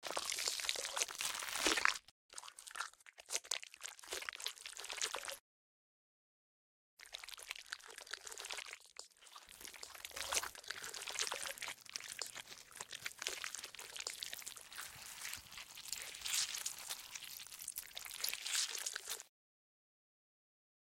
Zombie Bite 2
multiple zombie flesh bites and FX.